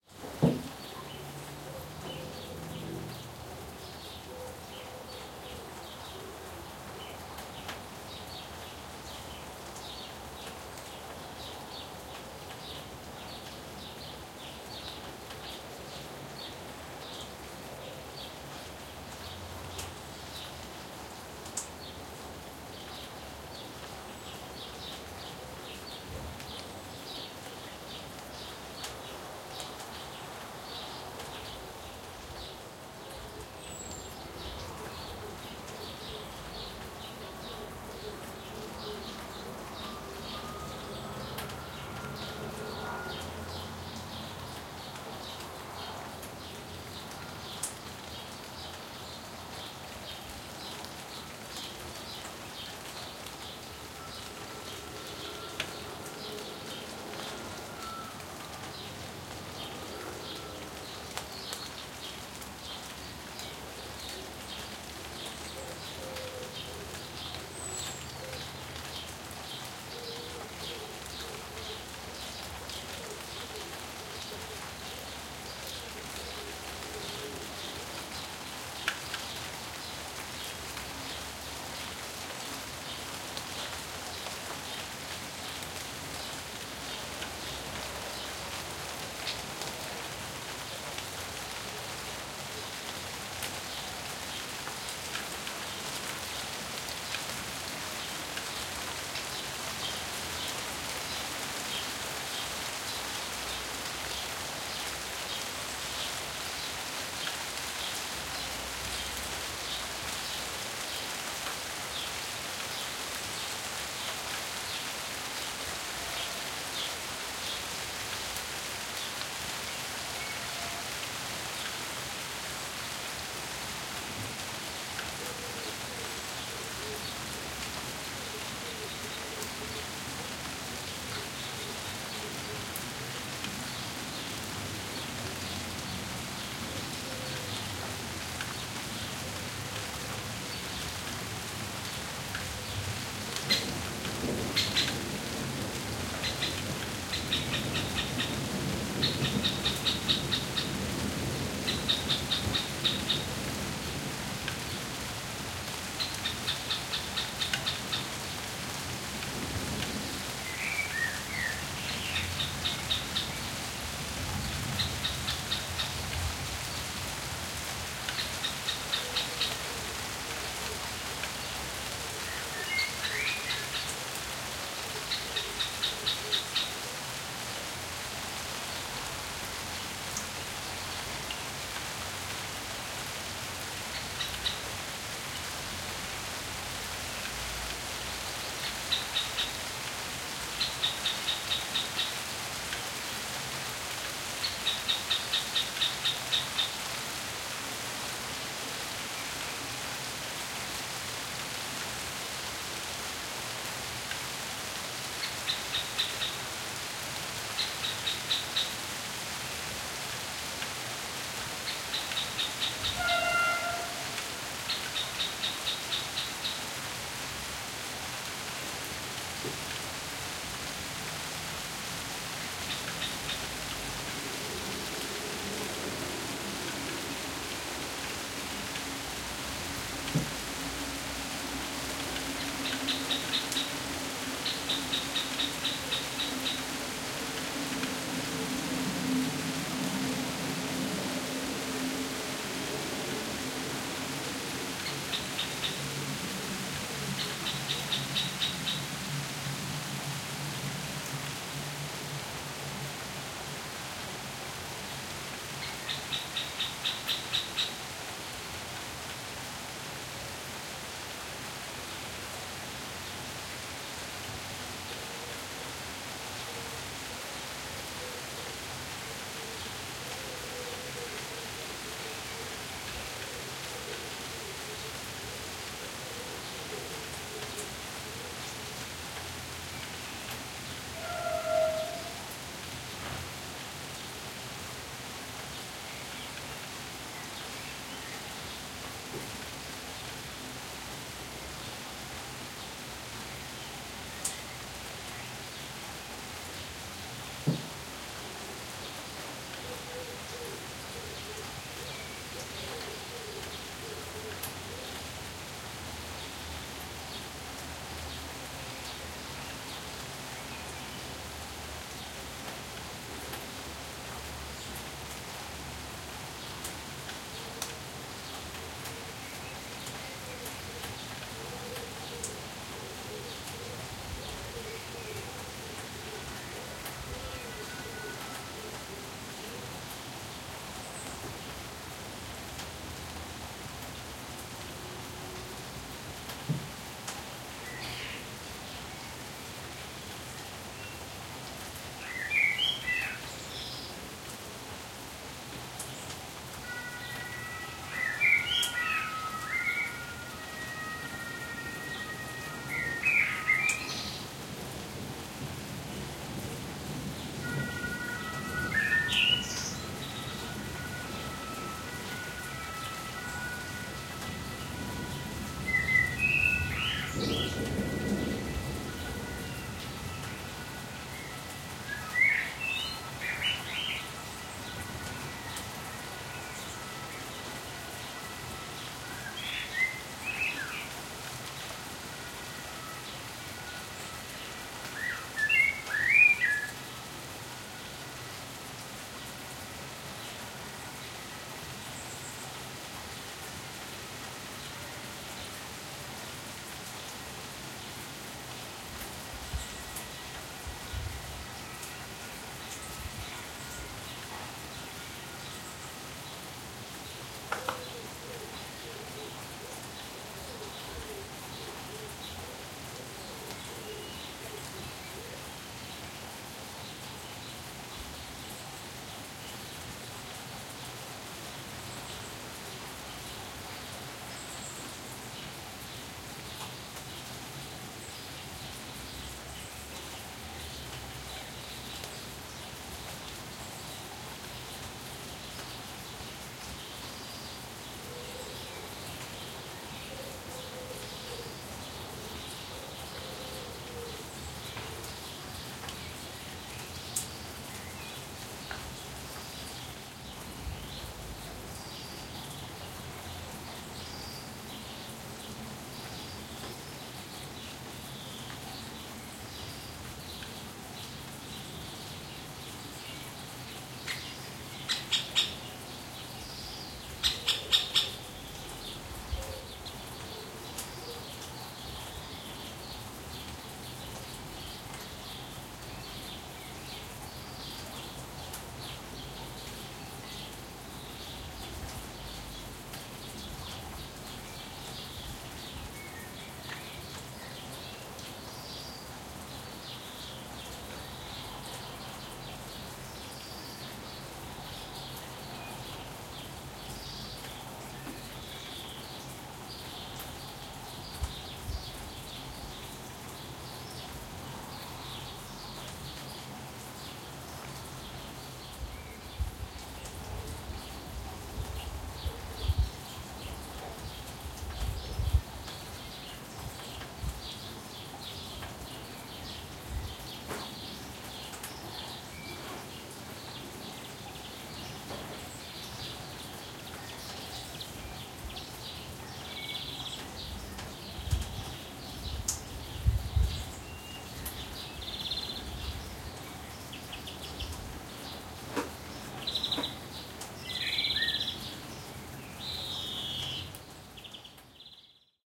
After a strong thunderstorm, I took this light rain in the garden. You can hear very quietly a siren in the background and a train horn
light, field-recording, ambience, birds, ambient, wind, rain, ambiance, garden, nature